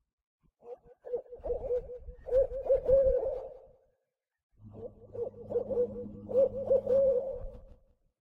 Hoot Owl2
Another version of owl sound effect.
hoot, owl, who, whoo, whooo